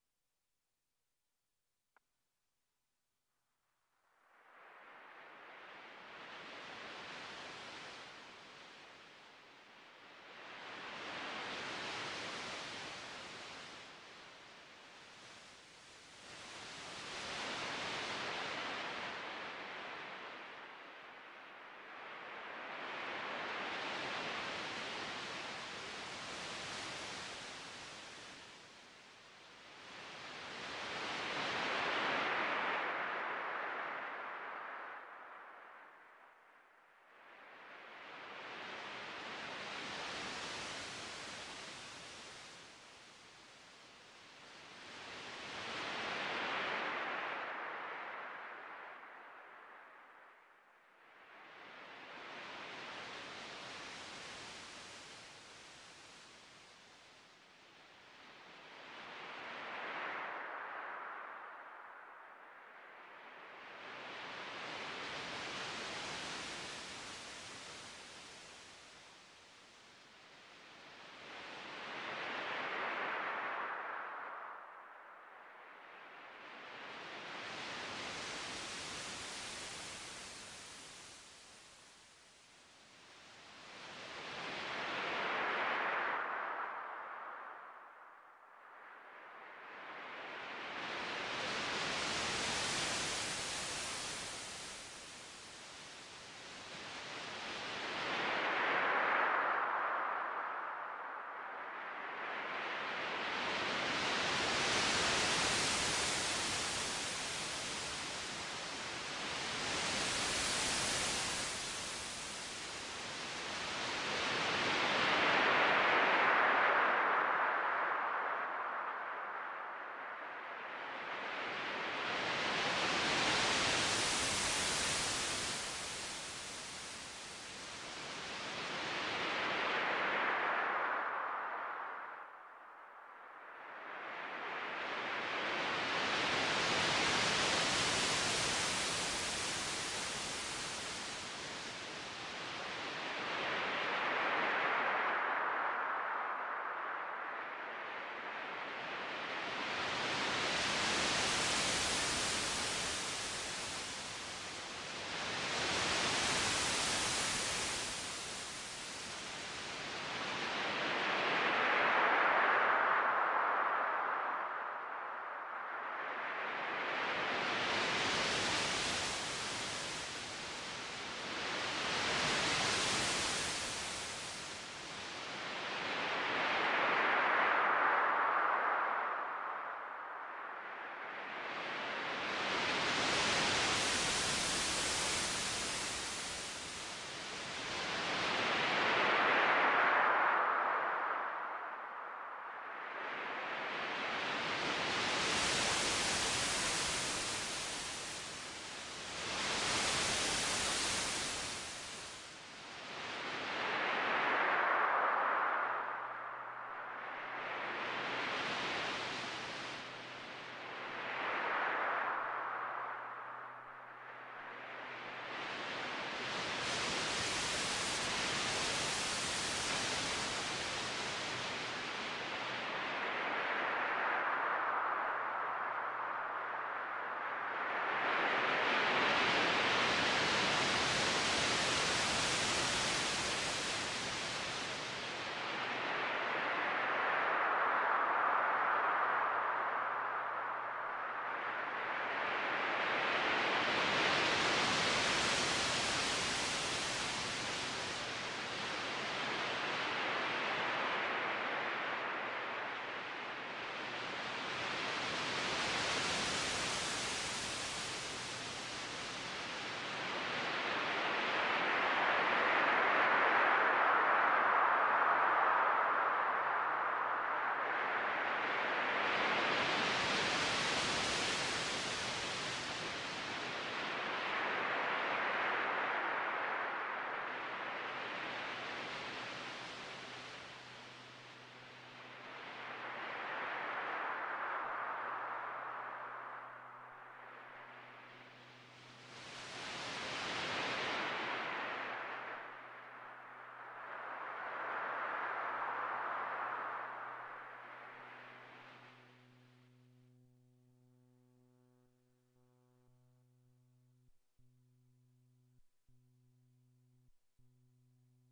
surf pad
This is part of a series of experimental synthesized tracks I created using a Korg Kaoss Pad. Performed and recorded in a single, real-time situation and presented here with no added post-production.
The KAOSS PAD lets you control the effect entirely from the touch-pad in realtime. Different effect parameters are assigned to the X-axis and Y-axis of the touch-pad and can be controlled simultaneously, meaning that you can vary the delay time and the feedback at the same time, or simultaneously change the cutoff and resonance of a filter. This means that complex effect operations that otherwise would require two hands on a conventional knob-based controller can be performed easily and intuitively with just one hand. It’s also easy to apply complex effects by rubbing or tapping the pad with your fingertip as though you were playing a musical instrument.
electronica, electronics, kaoss-pad, science-fiction, space, synth